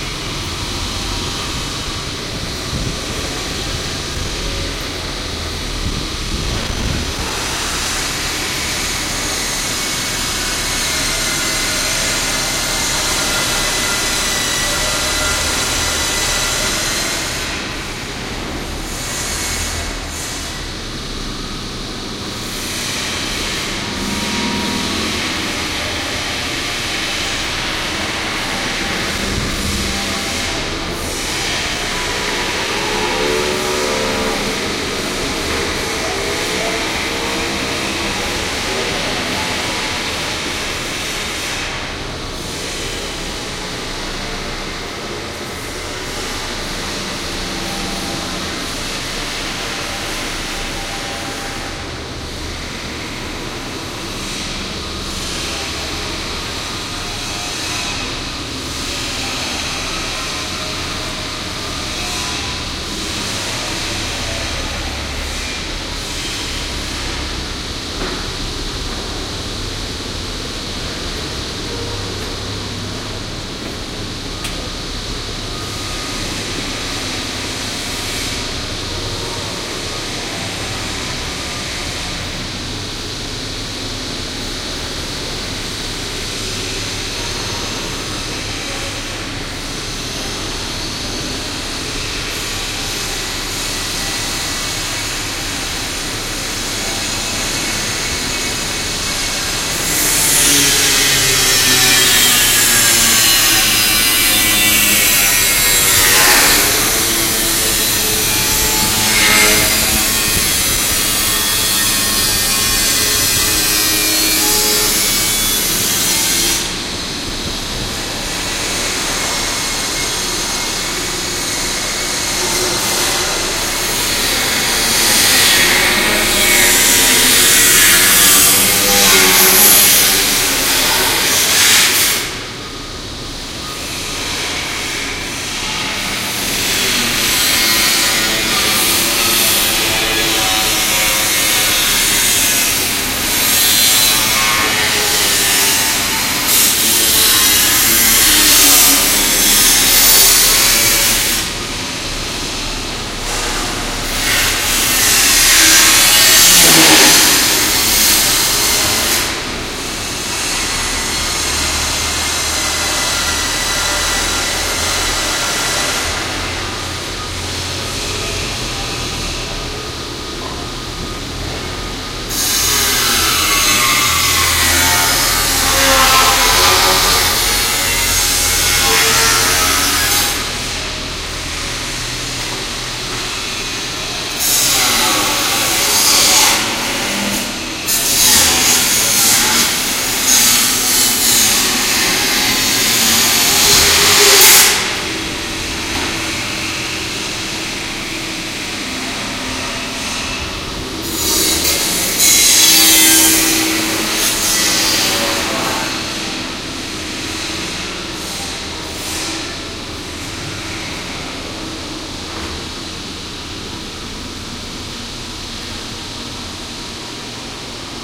Construction site 3
Field recording taken near a construction site. No post-processing.
city, construction-site, field-recording, industrial, noise, urban, work